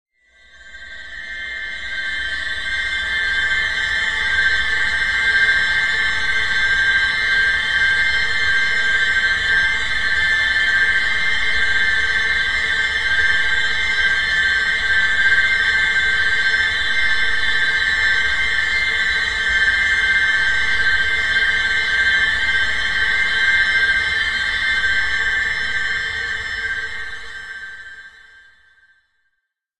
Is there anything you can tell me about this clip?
High Drone
A creepy, urgent droning/ringing.
space, ominous, future, sci-fi, dark, tone, creepy, drone, ring, tritone, atmosphere